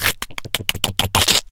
A voice sound effect useful for smaller, mostly evil, creatures in all kind of games.